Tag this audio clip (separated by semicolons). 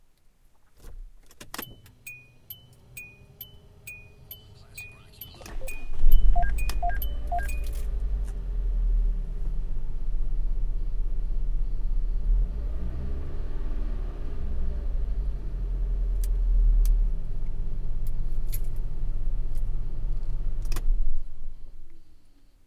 foley; zoom